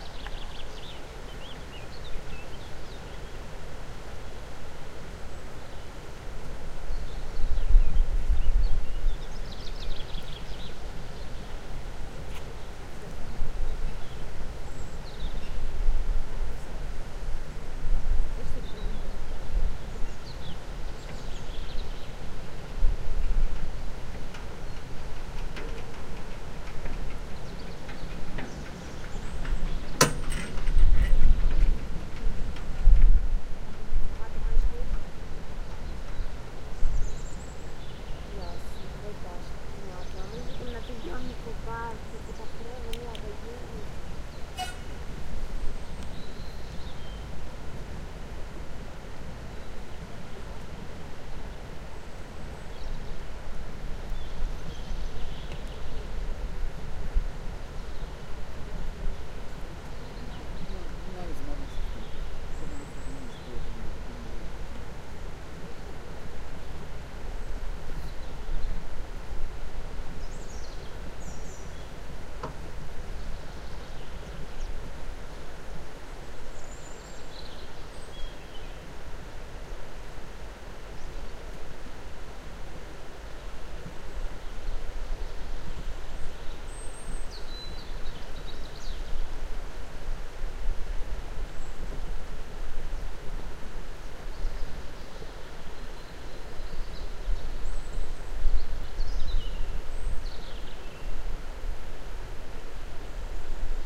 Recorded with Sony PCM-D50 in June 2014 on the cableway in the Carpathians, Ukraine.